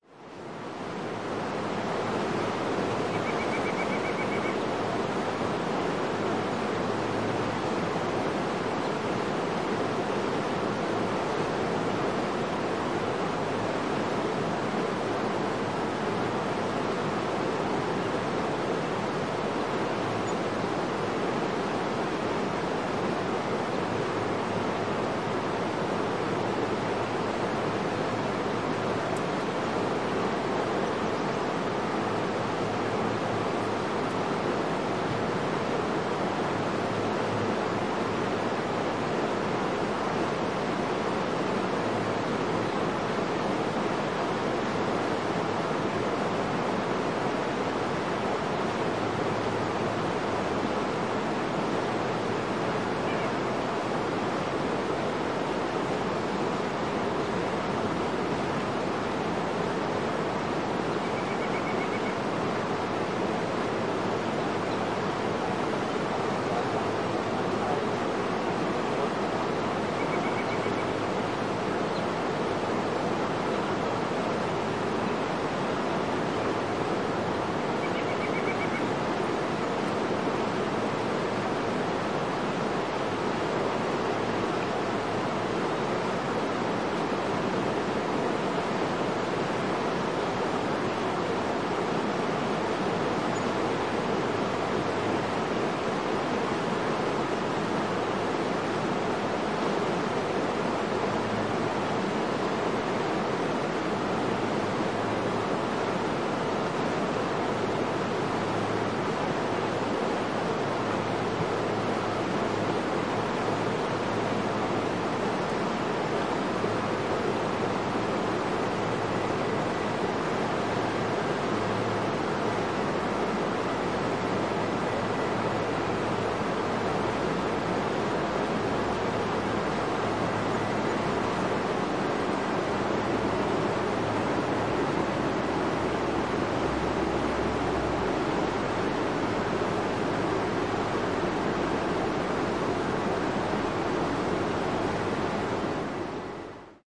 The sound of a roaring waterfall with birds chirping in the background